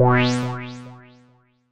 wah synth sound mad with Alsa Modular Synth
synth, wah